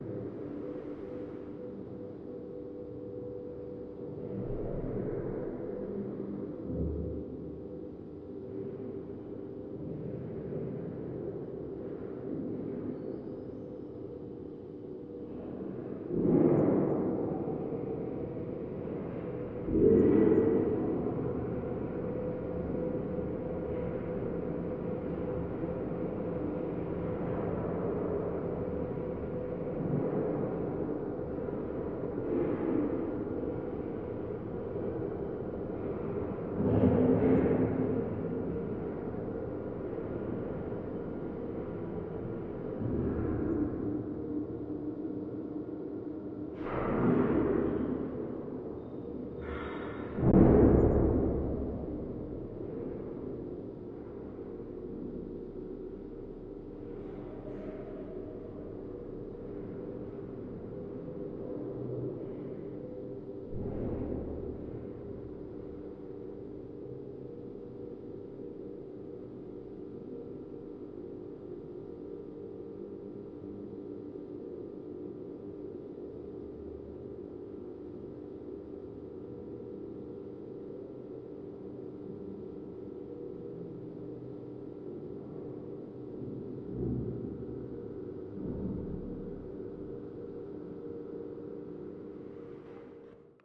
drone elevator shaft
A reverberated ambience recorded in some tower with elevator sounds heard in background.
reverberated, urban, tower